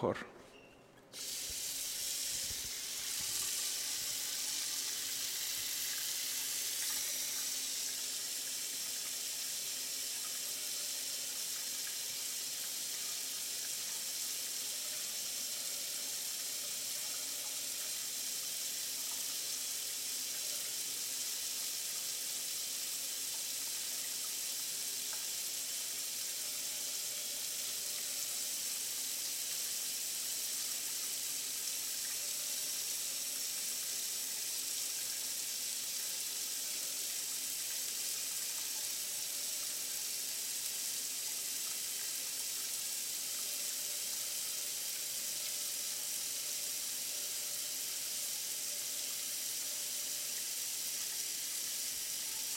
water faucet bathroom flow
bathroom, drain, faucet, sink, water